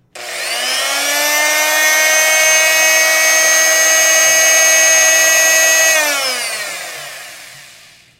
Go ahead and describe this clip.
vibrating sander and saw tool
A vibrating saw or sanding tool
shake, sander, vibrating, rub